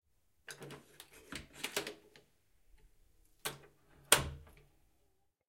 Door Open Close Interior
Recorded with a Zoom H4N in a Small House. An Interior Door Opening and Closing. Stereo Recording
close, door, gentle, interior, open, room, stereo